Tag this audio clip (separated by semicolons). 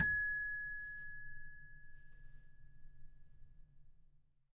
celeste; samples